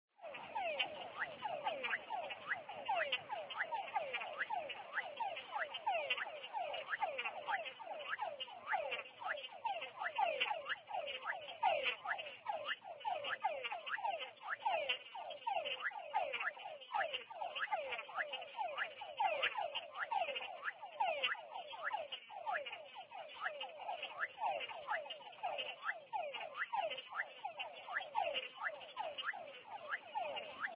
Frogs singing in a tropical rainforest night in Golfito, south of Costa Rica.
Ranas cantando en una noche tropical de Golfito, en el sur de Costa Rica.